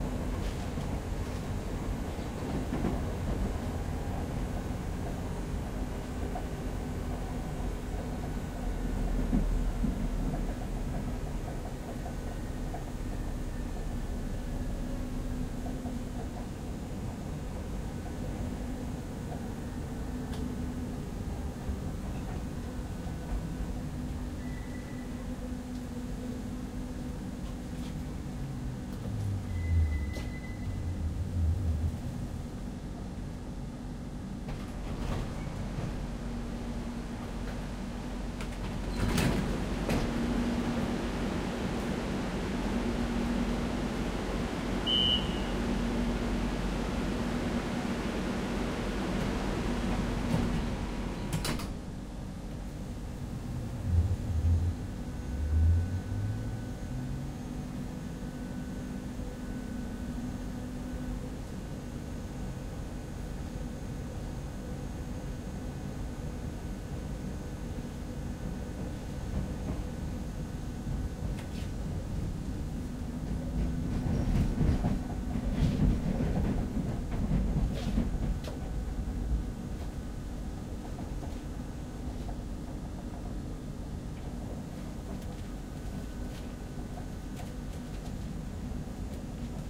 Interior of a subway car (vagón de metro)
Recording in the interior of a suburban in Madrid, Spain. Very clean sound with few people, as it was recorded very early in the morning.
Sound recording by Juan Jose Dominguez.
field-recording, interior, metro, train, suburban, metropolitan